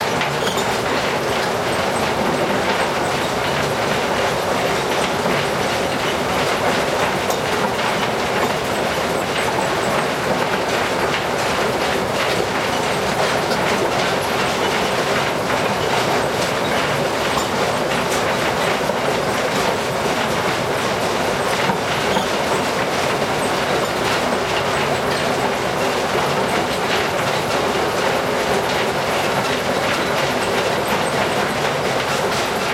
Coal supply on a conveyor belt. Please write in the comments where you used this sound. Thanks!